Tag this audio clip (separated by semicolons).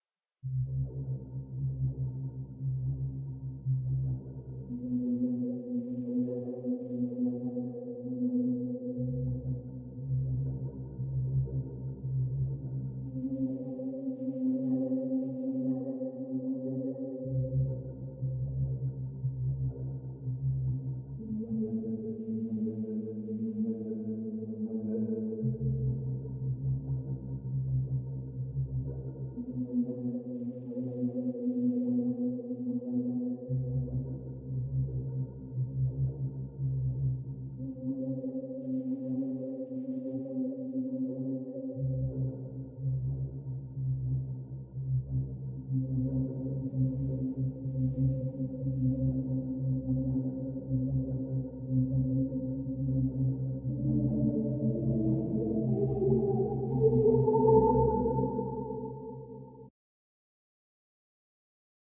electronic; wave; fx; space; effect; sound-effect; sound; synth